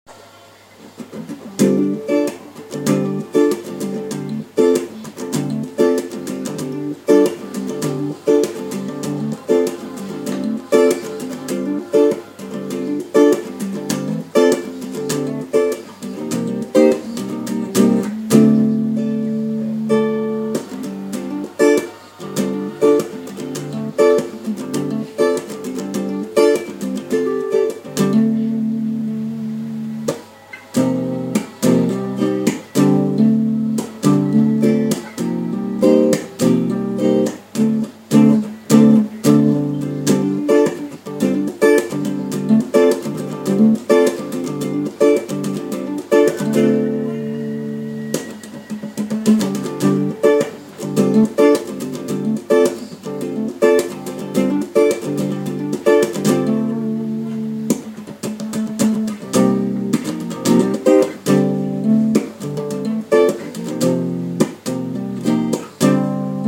Funky tune
Uneek guitar experiments created by andy
Guitar, instrumental, strings